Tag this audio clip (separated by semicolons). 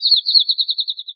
bird; birds; birdsong; field-recording; forest; nature